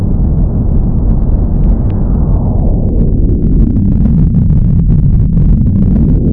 engine hum
A steady hum of the engine. At the end of the clip, it changes pitch quickly.